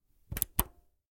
old crt monitor button turn on 01
The power button of an old CRT monitor is turned on.
Recorded with the Fostex FR-2LE and the Rode NTG-3.
button, crt, display, monitor, old, power, press, switch, tube